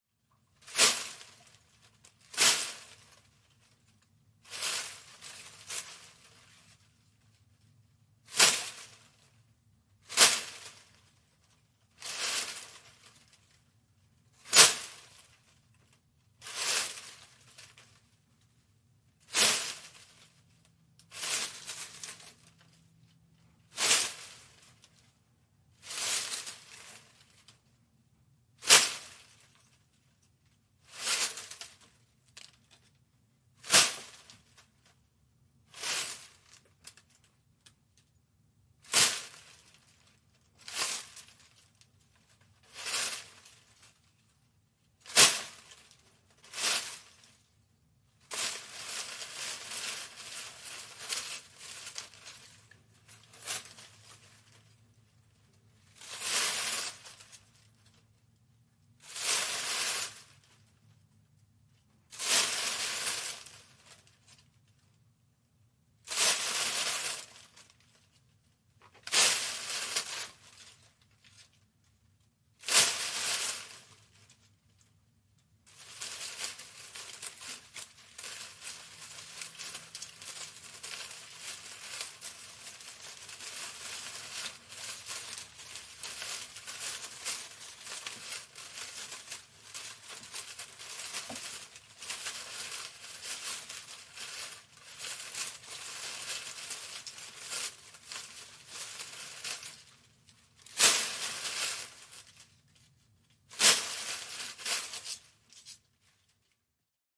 Branch Impact
No, we came here to share freely, so let's share FREELY! When we all rise, we ALL rise.
If you REALLY appreciate the work that went into this (cutting tree branches, getting them into my basement, setting up the mic, recording, post-production processing, cleaning up, uploading..)
If you like TTRPG roleplaying combined with cutting-edge sound design, you'll like Sonic Realms. There's nothing else like it!
Thanks! I hope this is useful to you.